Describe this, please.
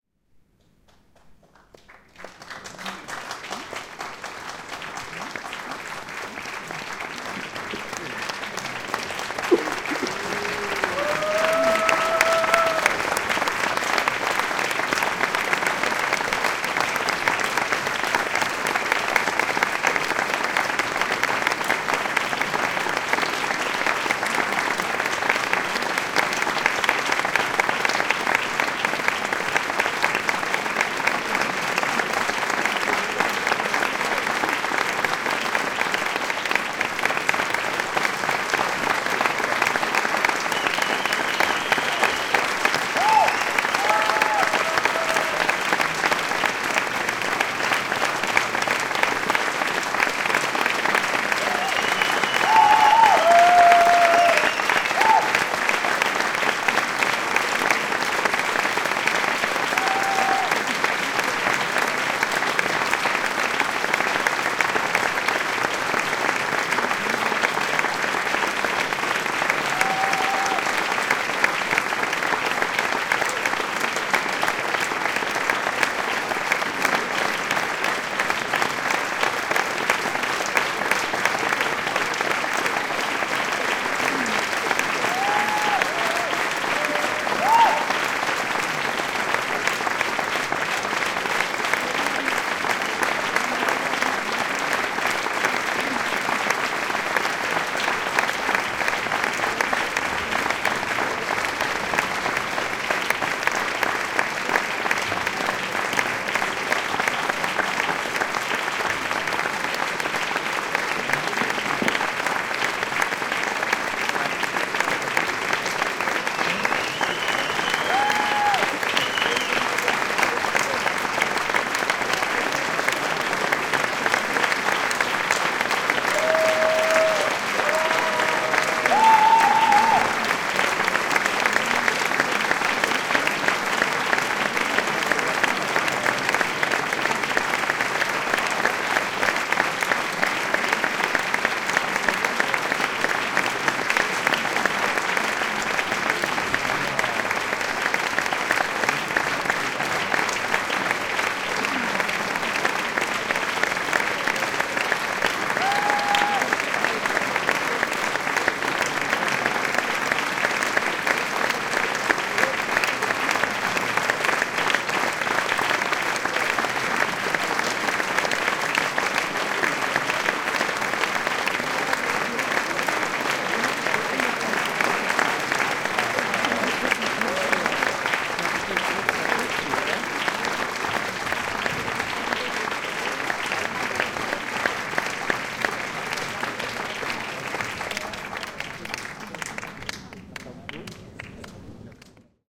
Hyperion's Applause
People clapping and cheering after a performance of "Hyperion. Briefe eines Terroristen" in the Schaubühne in Berlin, Germany. Recorded with a Zoom H2 and leveled and normalized in Audacity. Also removed a click and a voice piece at the end.
applaud, applauding, applause, audience, auditorium, berlin, cheer, cheering, clap, clapping, claps, crowd, group, hand-clapping, hne, people, schaub, theater, theatre